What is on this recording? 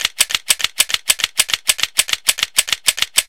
recharger revolver repeat
recharger, revolver